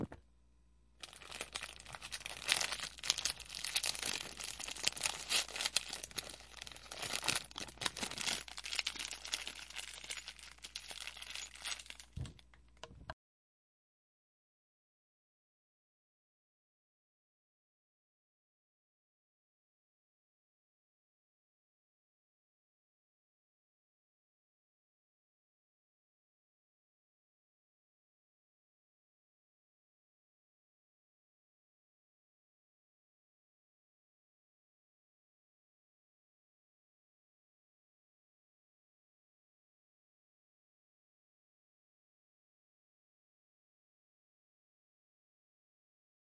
Opening A Package
like christmas day all over again!
recorded with a zoom mic